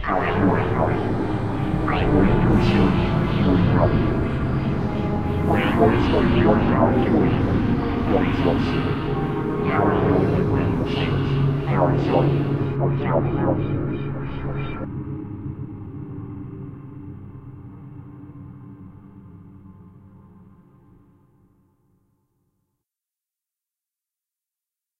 dark talking
some demonic sounding talking with dark reverberated music in the background.
devil, evil, hell, ambience, satan, talking, ambient, dark